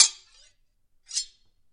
Sword noises made from coat hangers, household cutlery and other weird objects.